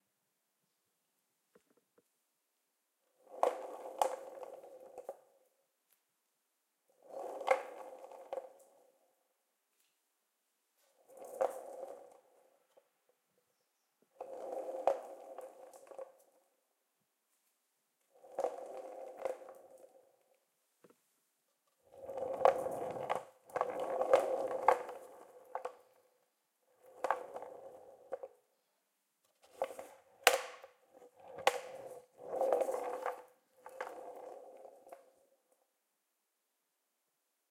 Skateboard: Skateboard rolling on tiles, rolling back and forth on floor, rolling fast and slow. Recorded with a Zoom H6 recorder using a stereo(X/Y) microphone. The sound was post-processed in order to enhance sound (subtle compression and EQ).
Skateboard-rolling, Skateboard, Rolling